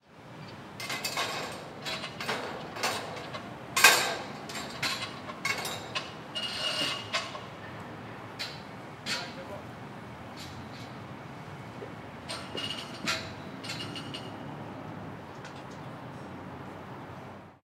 Fer-Bruits2
Manipulation of metal stuff in a building site recorded on DAT (Tascam DAP-1) with a Rode NT4 by G de Courtivron.
building, iron, metal, noise, site, work